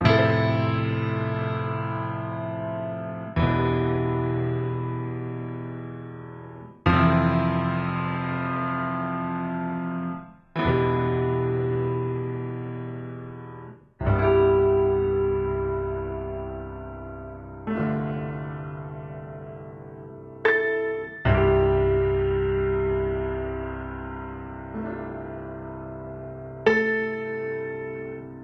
Piano, "Window Piano" - 135bpm
Piano track from the unreleased song "Window Piano".
135bpm
OST, movie, Window, Feelings, Sample, soundtrack, music, atmosphere, Moody, Sad, Piano, Somber